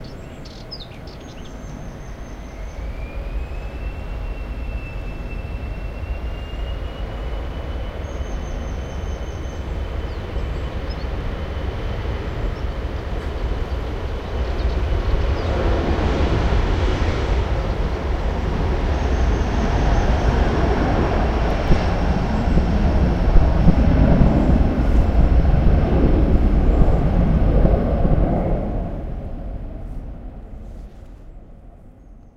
In this recording you can listen a Sparrow, Black headed Warbler and a Serin. Recorded with a Zoom H1 recorder.